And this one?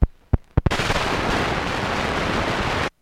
The sound of the stylus jumping past the groove hitting the label at the center of the disc.